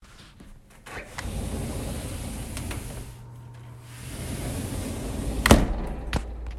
Slider door sound
the sound of a slider door
slider, open, door